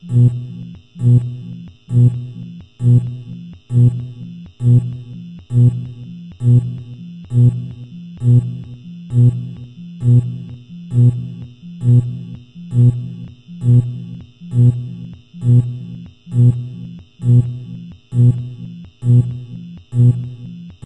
Alarm sound 12
A futuristic alarm sound.